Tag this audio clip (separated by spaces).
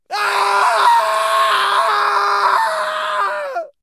anguish clamor cries cry distress emotional howling human male sadness scream screech shout sorrow squall squawk vocal voice wailing weep